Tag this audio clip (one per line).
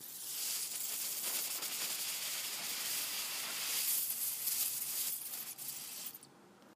5kHz
Buzz